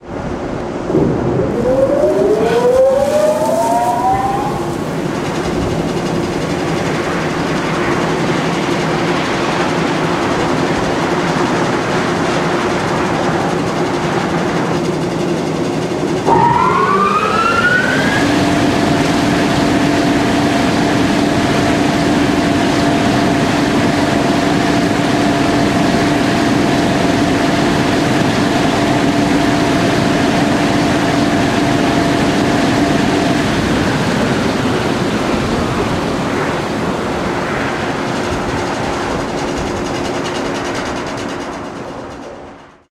This sound was captured from the top of a cooling tower fan at DFW airport's main air conditioning system. The motor is energized at slow speed. After it runs at that speed for a while it then ramps up to full power - soon after it powers down. Originally recorded on BetaSP with a single Sennheiser short gun microphone.
large-motor, fan, field-recording, cooling-tower